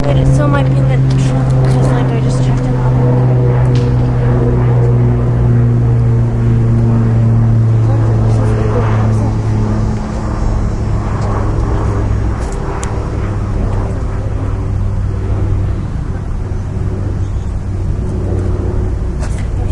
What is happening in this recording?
Trying to record an airplane.